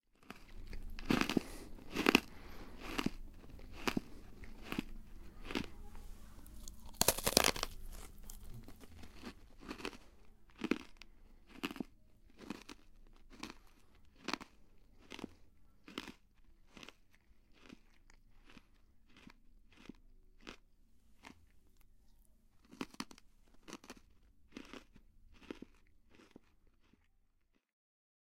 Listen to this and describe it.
Recorded a friend eating a zoo cookie, this sound can also be used for walking into snow type of effect.